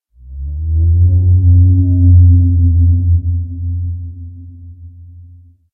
rnd moan30
ambient organic moan sound
ambience, organic, ambient, moan, atmosphere, outdoor, dark, random, horror